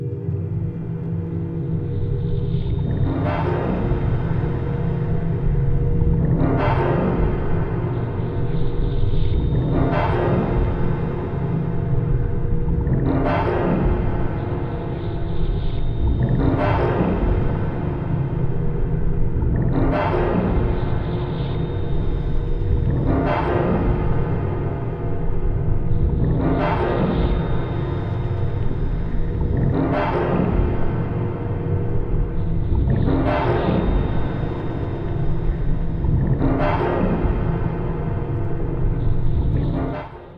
alien work house - from tape
Mars 2030, the first mission lands. and a couple of days later are in enslave. there taken deep into mars inertia and of tunnels and passageways set to work in a large cavern there many type of people there all have been inner bred into specialize worker,s and now there fresh DNA has arrived
factory, work, alien, industrial, space, mars, slave